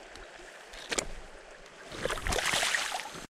Hook and Release
The sound of a fly hook being removed from a Golden Dorado before the fish is released back in to the water
creek, field-recording, fish, fishing, fish-release, fish-splash, hook-removed, nature, release, river, splash, stream, water, water-splash